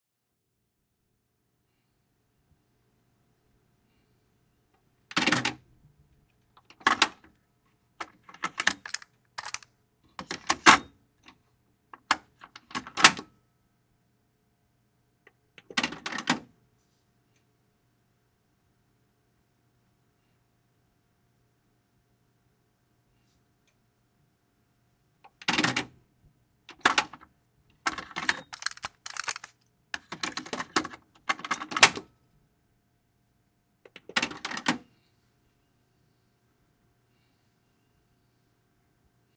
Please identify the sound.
Recording of stopping a playing cassette, flipping the cassette from side A to B and (re) starting the playing again.